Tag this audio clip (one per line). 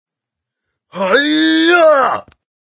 game
fight
video
character
voice
ninja
sound
battle